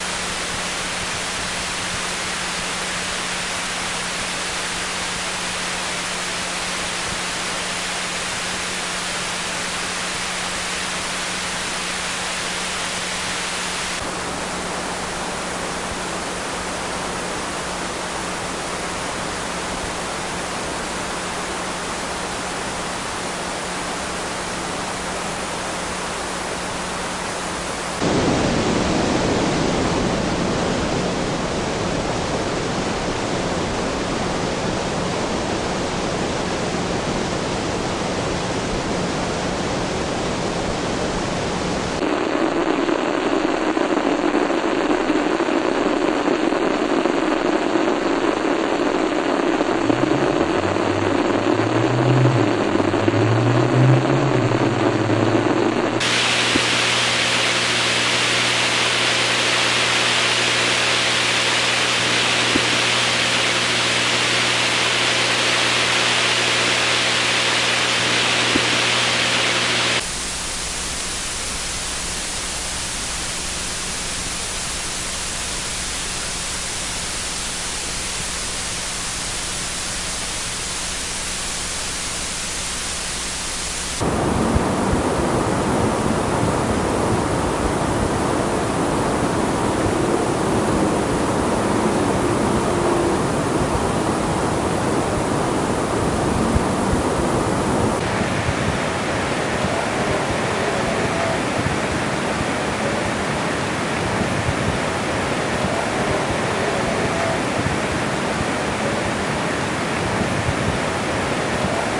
Highly Amplified Tape Machine Noise Floors (Morphagene-formatted)
This Reel contains 8 Splices of noise floor sounds captured from four tape machines playing back blank tape. These sounds were then heavily amplified, resulting in a variety of noise textures to be played back on the Morphagene. They include:
A Nagra 4.2, playing back tape at (1) 15ips, (2) 7.5ips and (3) 3.75ips as well as (4) at 3.75 through its internal speaker.
(5) A Panasonic RQ-194S playing back tape at 3.75ips
(6) A TEAC X-2000R playing back tape at 7.5ips
A Sony Walkman playing back tape at its (7) "Fast" and (8) "Slow" speeds.
Be sure to check out the other entries in our Noise Reel series:
Happy patching!